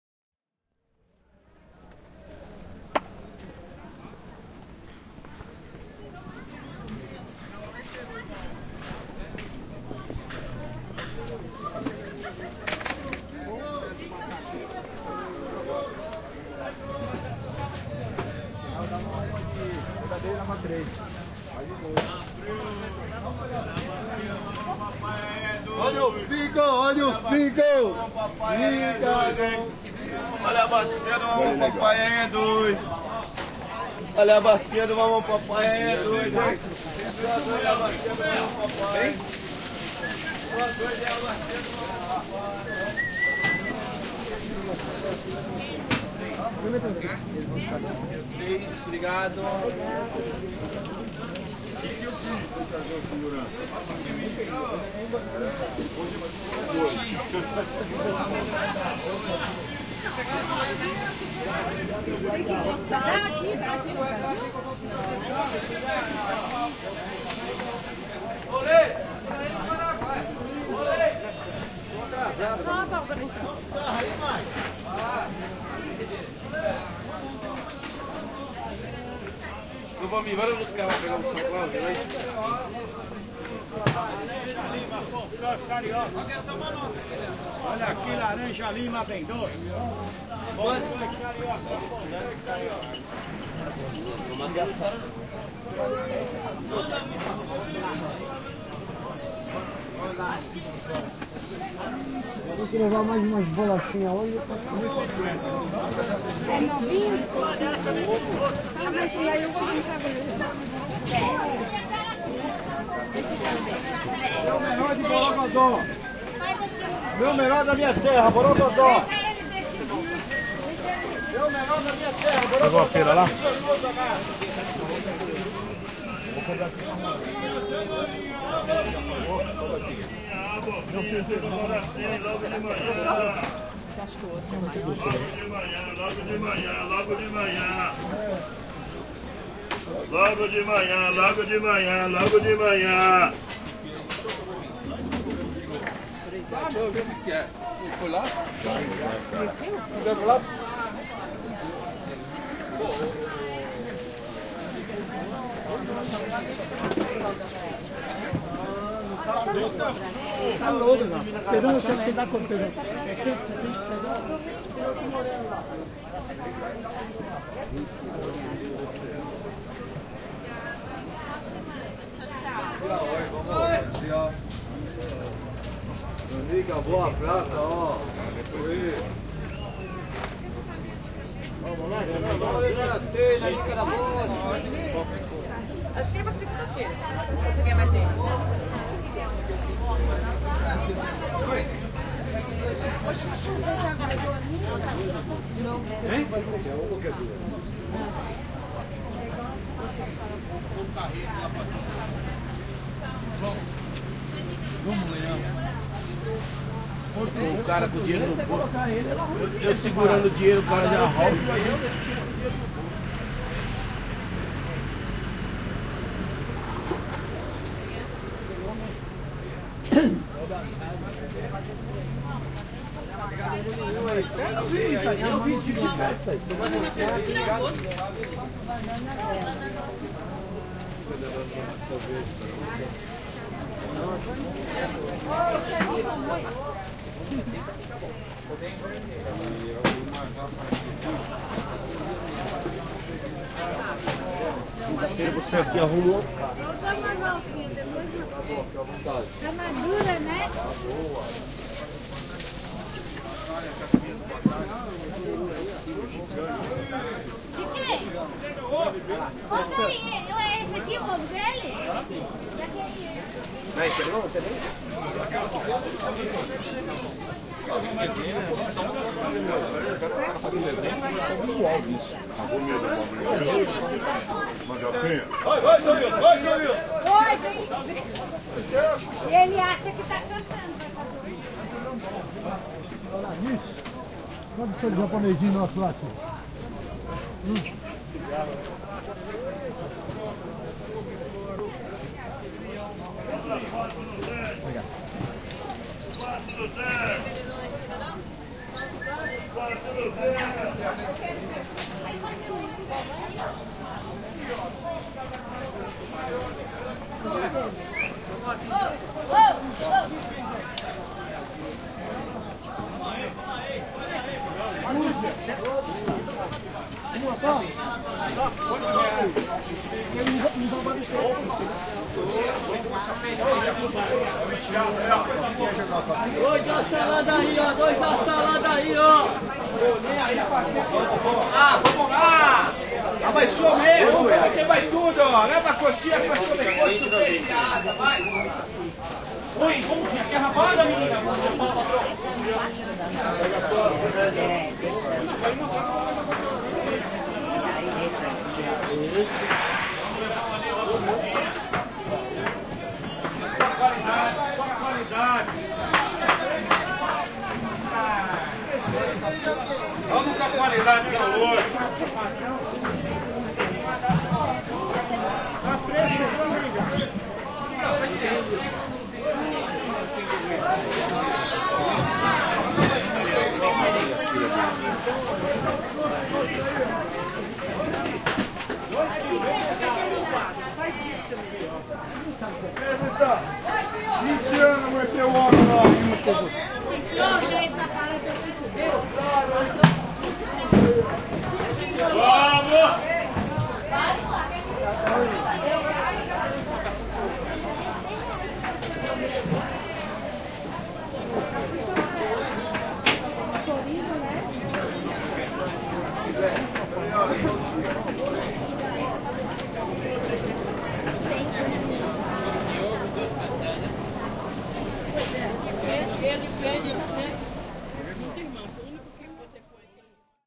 Street Fair - São Paulo - Brazil
Sound walk in a street fair in São Paulo, Brasil, around 10 am, on a sunny saturday. Recorded on a Zoom H4n - built-in microphones, in backpack.
alreves, Brazil, field-recording, programa-escuta, Sao-Paulo, sound-walk, stereo, street-fair